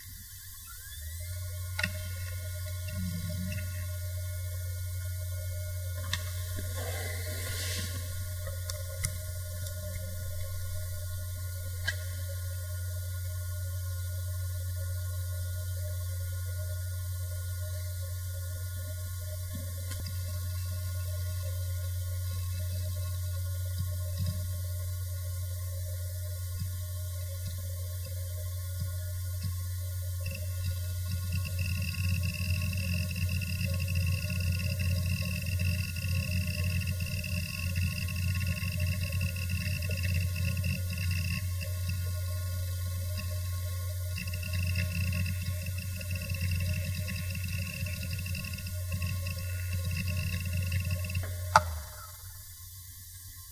Fujitsu Picobird 15 - 5400rpm - FDB
A Fujitsu hard drive manufactured in 2000 close up; spin up, writing, spin down. (mpf3102at)
hdd, hard, machine, rattle, drive, disk, motor